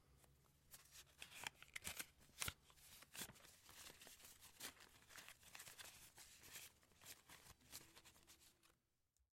Counting Money faster (bills)
Counting money bills fast (Pesos and Dollars)
(Recorded at studio with AT4033a)
dollar, pesos, money, count, bills, cash